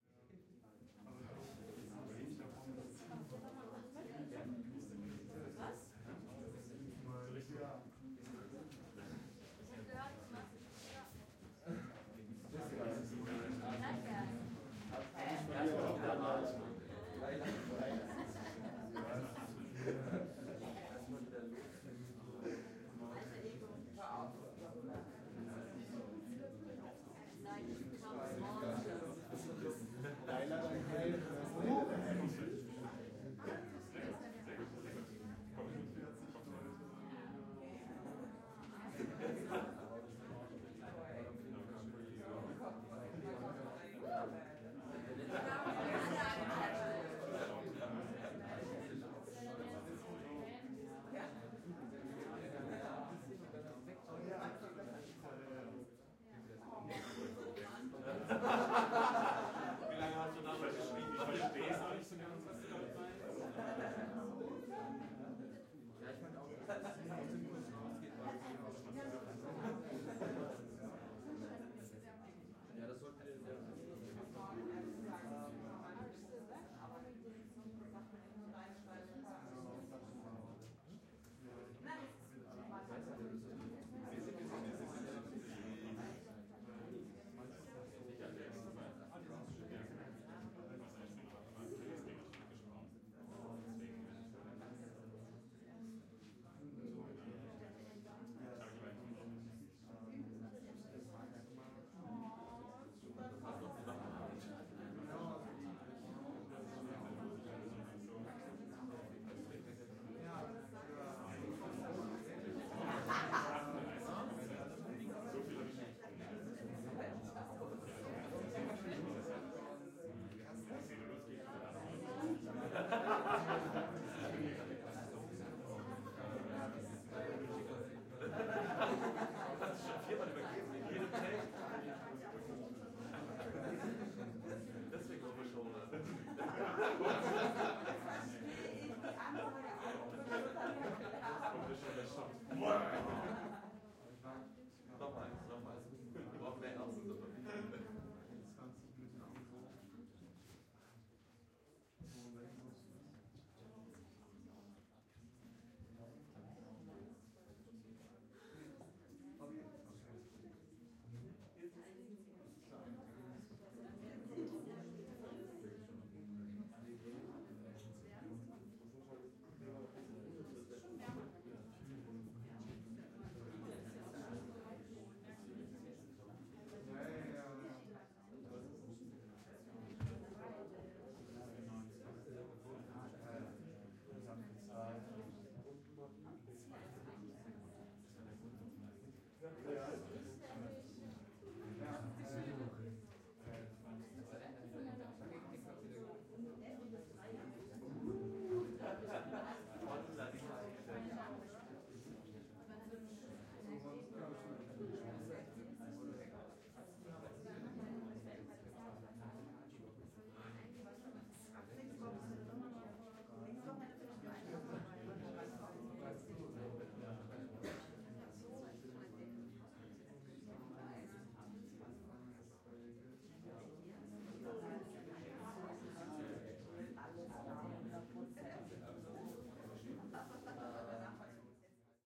Party-People indoor 01 - calm, relaxed (german)
"Walla" recording of people talking german in a room for a party scene. calm and relaxed (a more lively variant is "Party people indoor 02").
Recorded with a ORTF pair of MKH40 on a SD 744T.
ambience, AT, bar, calm, club, conversations, crowd, german, happy, human, indoor, INT, nightclub, party, people, people-talking, relaxed, talking, Walla